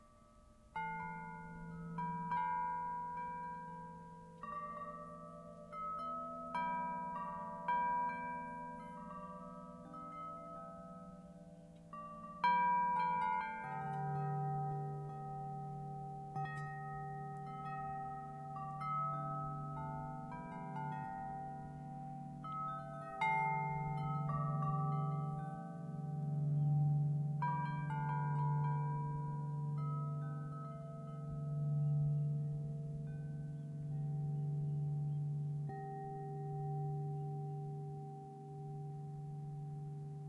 Relaxing wind chime
I recorded this beautiful wind chime sound in my garden with the zoom iq6 micrphone. windcatchers are a very good tool for relaxation.